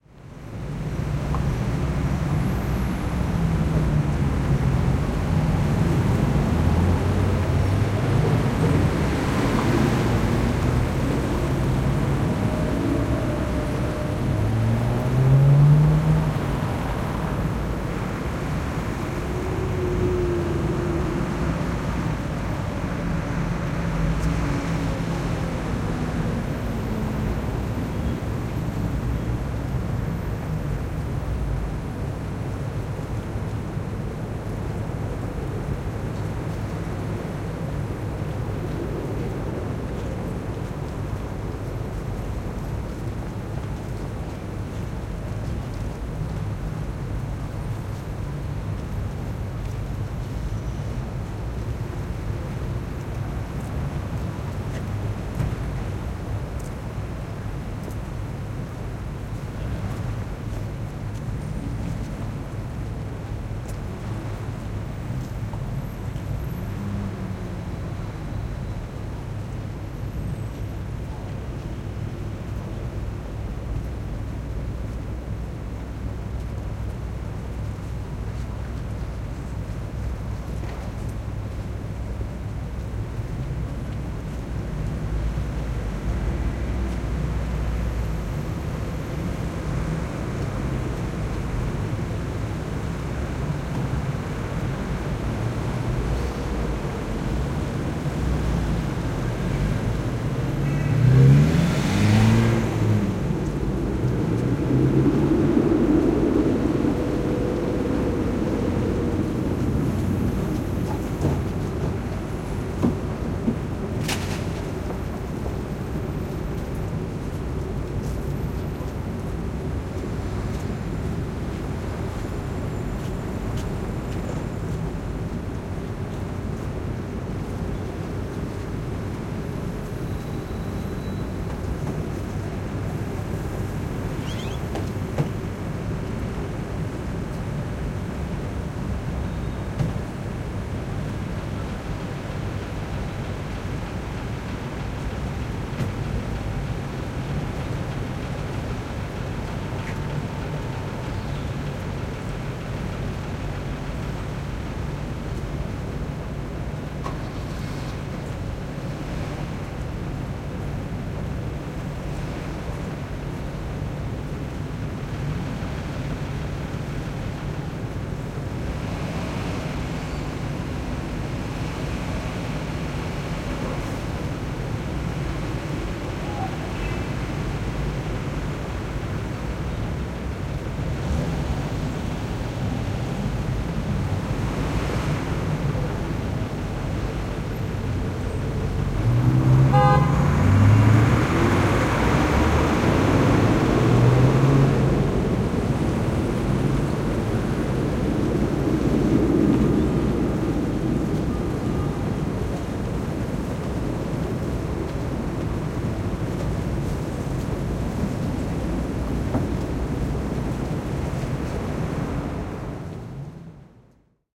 Katuhäly, kaupunki, talvi / Street in the city in the center of Helsinki in the 1970s, winter, cars, trams, footsteps, constant traffic, horn 3:10
Katuliikennettä Helsingissä Kolmen Sepän patsaalla 1970-luvulla. Autoja, askeleita, raitiovaunu, 3'10" auton torvi. Tasaista kaupunkiliikennettä.
Paikka/Place: Suomi / Finland / Helsinki
Aika/Date: 21.12 1978